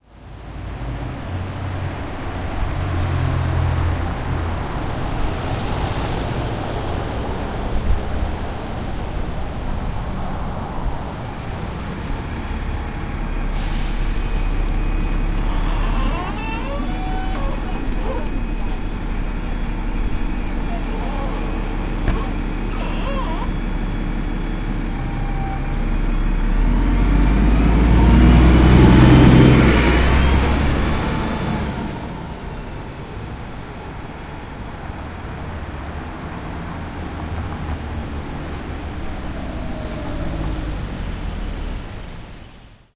london bus approaches & leaves
A busy London street, with the approach from the right of a London bus, the sound of the middle doors squeaking open, then shut, and the bus departing
approach, bus, departure, london, london-bus, vehicle